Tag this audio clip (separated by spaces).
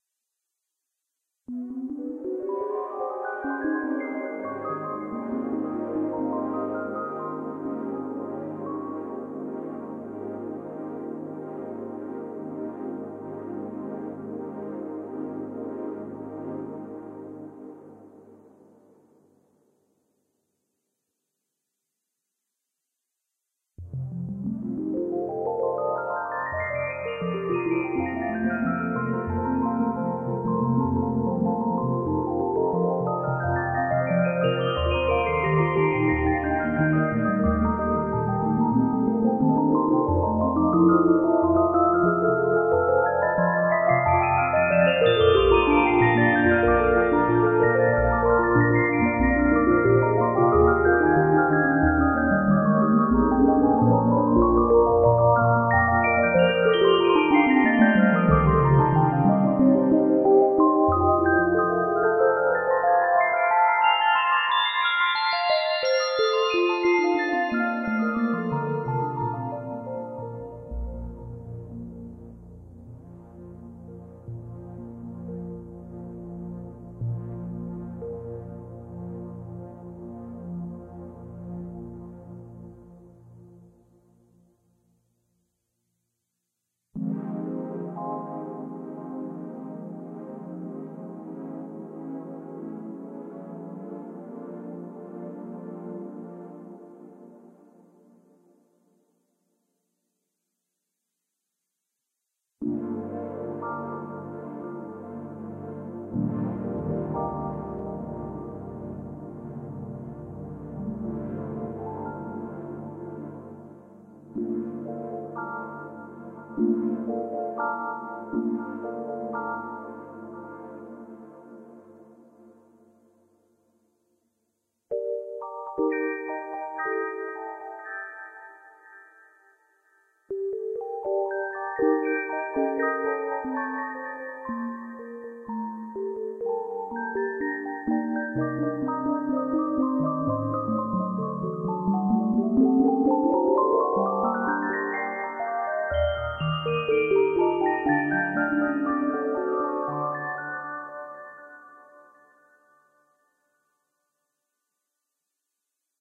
atmospheric fairytale synth